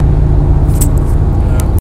SonyECMDS70PWS digitaldeath6
digital, microphone, test, unprocessed